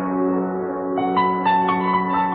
My Casio synth piano with distortion and echo applied. An excerpt from a longer recording.